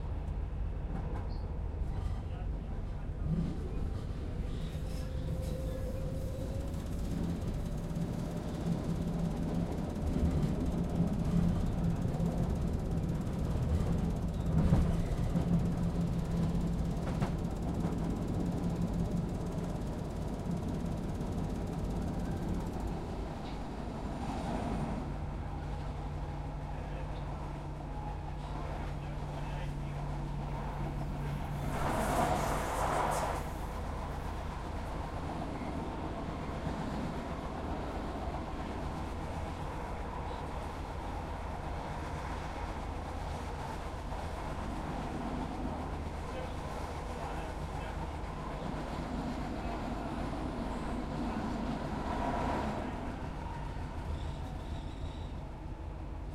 interior train metro subway underground between two stations overground another train passes
above-ground, field-recording, interior, light-rail, metro, overground, subway, train, travel, travelling, underground